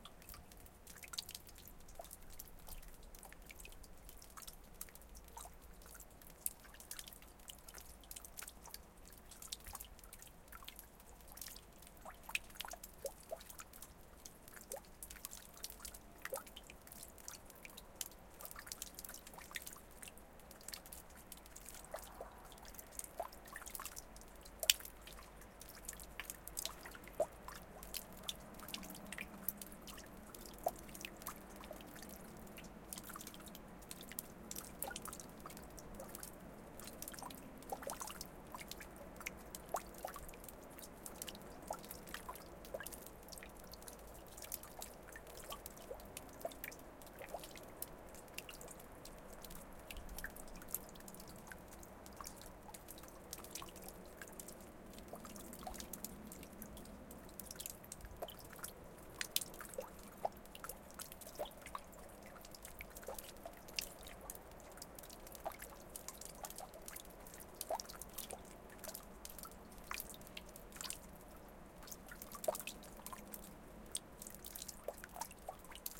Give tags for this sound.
drip
field-recording
ice
Water
dripping
ambiance
exterior
Zoom-H5

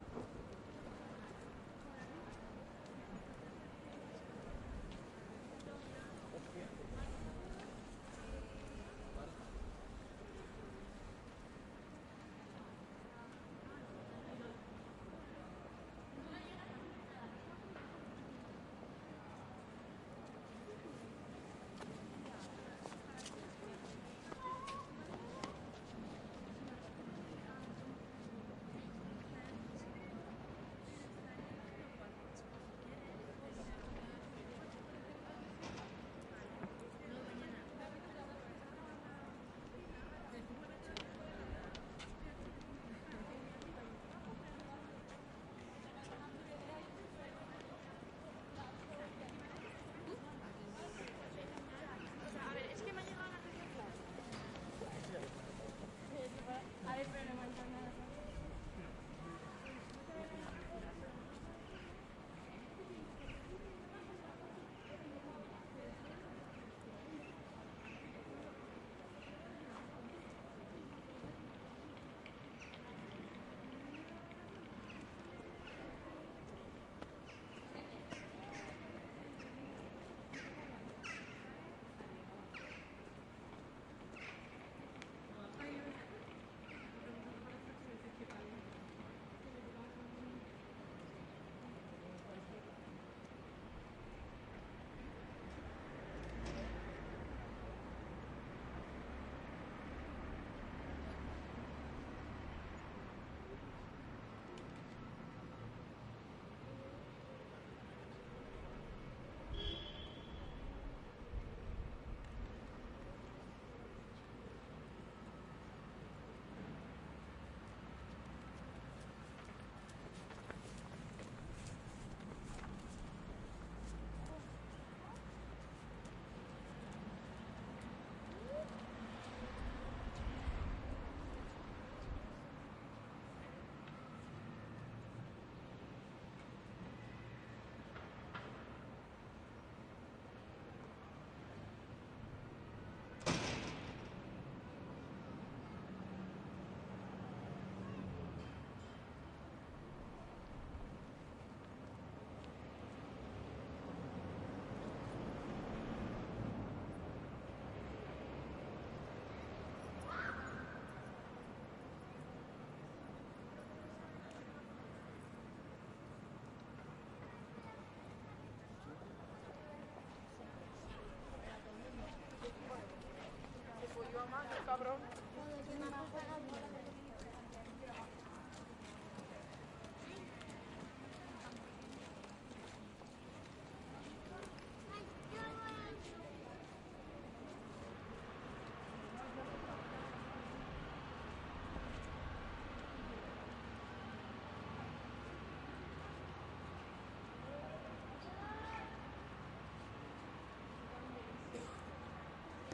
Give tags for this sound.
ambience
ambient
atmosphere
city
field-recording
general-noise
huesca
people
sound
soundscape
square

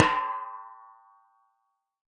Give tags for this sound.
1-shot
drum
multisample
tom
velocity

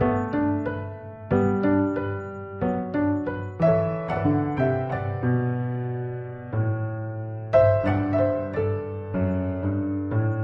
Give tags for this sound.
acoustic
piano

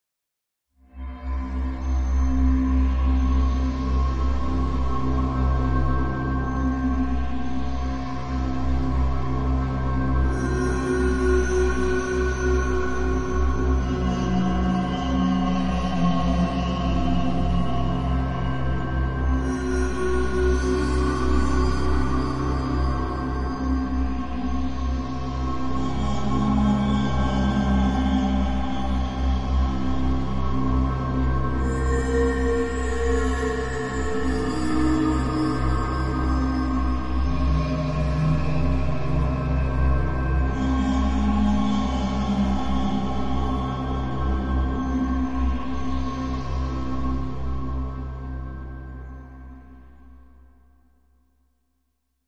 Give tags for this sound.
ambience
ambient
atmosphere
background
bridge
dark
deep
drive
drone
effect
electronic
emergency
energy
engine
future
futuristic
fx
hover
impulsion
machine
noise
pad
Room
rumble
sci-fi
sound-design
soundscape
space
spaceship
starship

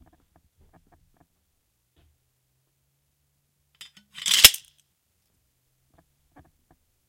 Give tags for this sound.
Action
Civil-War
Sheath
Sword
Weapon

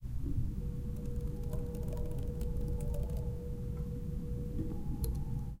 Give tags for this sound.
button
controller
enter
keys
laptop
light
plastic
strokes

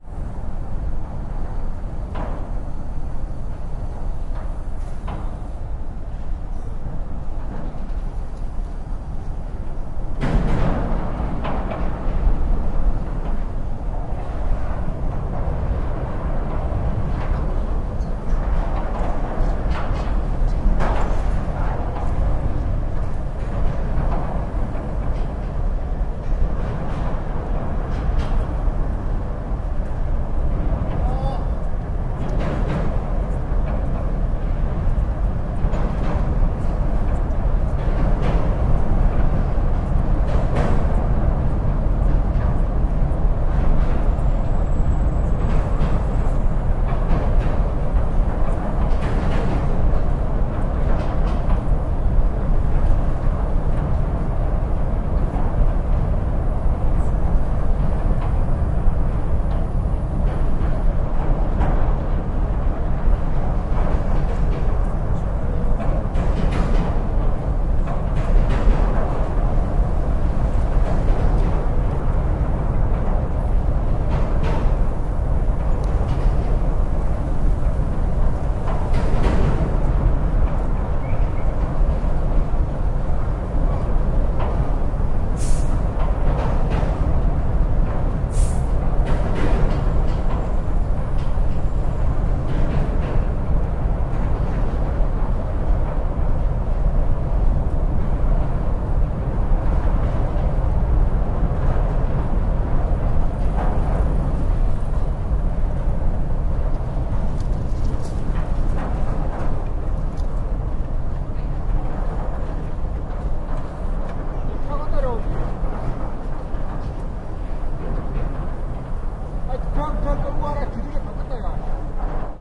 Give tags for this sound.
korean
seoul
traffic
korea
field-recording
truck

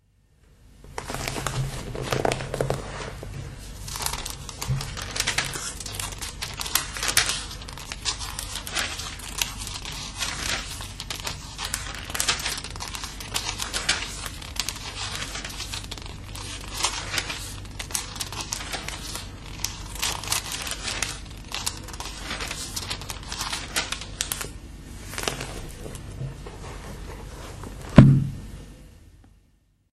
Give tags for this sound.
book
turning-pages

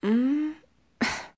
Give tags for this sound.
girl
female
voice

